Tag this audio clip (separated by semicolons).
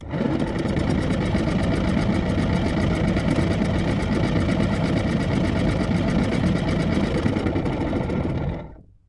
heater; volvo